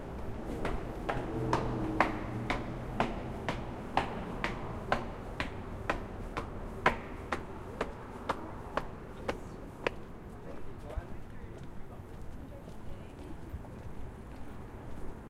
Subway Amb Exit Footsteps 02
Leaving subway station walking upstairs, single person footsteps
field-recording, Zoom, subway, NYC, MTA, H4n